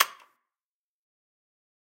Button plastic 2/4
A Click of an old casetterecorder.
Could be use as an sound for a menu or just sounddesign.
Hit me up for individual soundesign for movies or games.
game, horror, Tape, trash, Casette, video, plastic, Click, home, effect, foley, sounddesign, menu, Button, Machine, Press